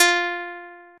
Plucked
Guitar
Single-Note